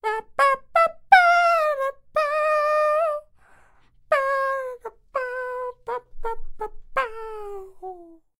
21-Posible Musica
Foley practice music with voice
Foley, music, practice, sensual, voice